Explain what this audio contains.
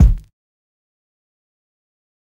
light and wide kick sound